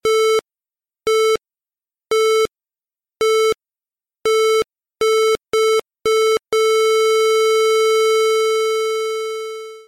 heart stop
atmo, thrill, dramatic, background, bogey, ambiance, drama, soundscape